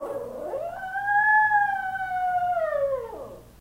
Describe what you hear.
Howl number 3 from Shaggy the chinese crested.